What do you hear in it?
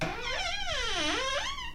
Squeak one
Recording of my squeaky bathroom door hinge
hinge, door, squeak